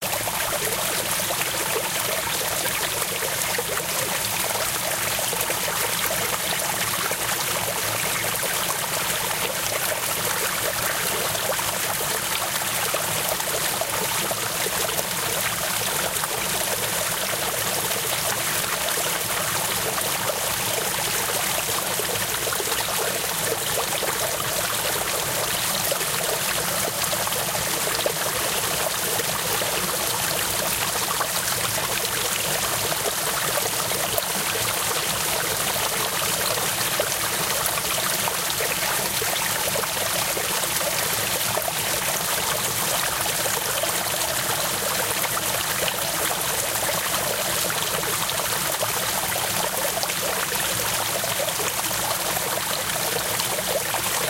Recorded on a sunny day in southern Arizona hillside near Box Creek Cayon using a ZOOM 2
brook, over, rocks, Running, Splash, Stream, Water